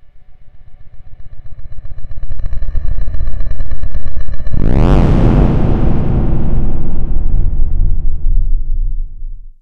this sound like a weird engineI needed aggressive sounds, so I have experienced various types of distortion on sounds like basses, fx and drones. Just distorsions and screaming feedbacks, filter and reverbs in some cases.
bass, deep, distorsion, electro, feedback, illbient, soundeffect, sub